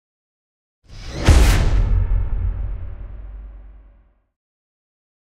Riser Hit sfx 040
Riser Hit effect,is perfect for cinematic uses,video games.
Effects recorded from the field.
Recording gear-Zoom h6 and Microphone - RØDE NTG5
REAPER DAW - audio processing
sweep, deep, riser, logo, bass, game, indent, epic, cinematic, hit, stinger, effect, industrial, thud, explosion, implosion, movement, sub, reveal, video, tension, metal, trailer, impact, boom, sound, transition, whoosh, gameplay